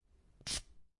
Post-it hi-hat
A post-it being rubbed against itself, which can work as a hi-hat for example.